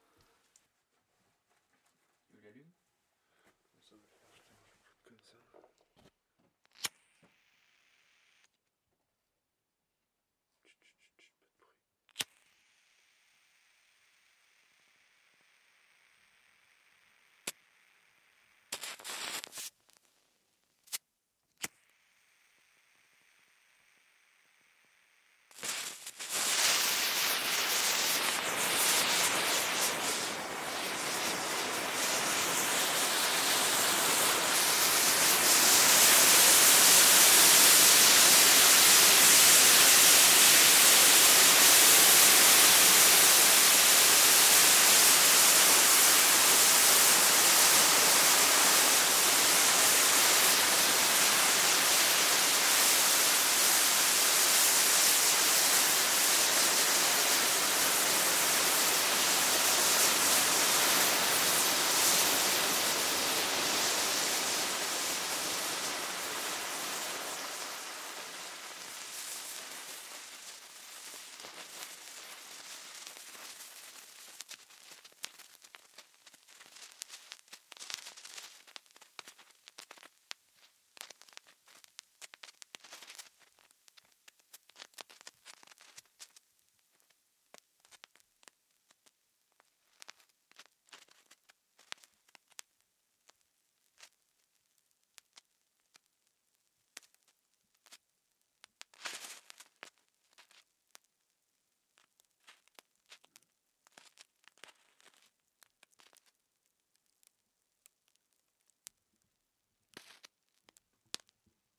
Fumigene maison
A home made smoke recorded on DAT (Tascam DAP-1) with a Sennheiser ME66 by G de Courtivron.